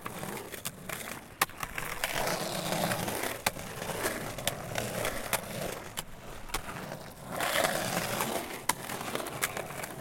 Long-Close 1
The sound of skate boards that i take for my video project "Scate Girls".
And I never use it. So may be it was made for you guys ))
Close Ups.
board, close, creak, hard, long, riding, skate, skateboard, skateboarding, skating, tight, wheels, wooden